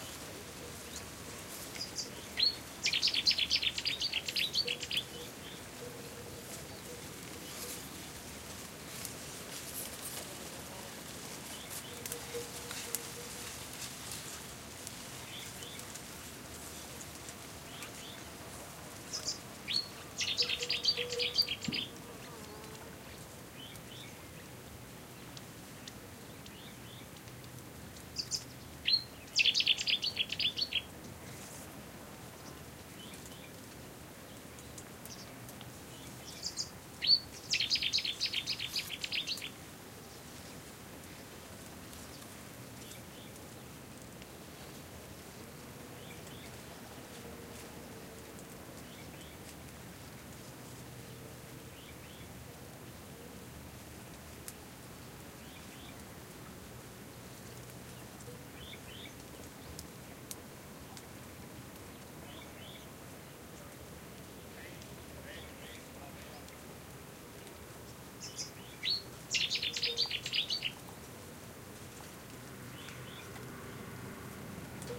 a bird call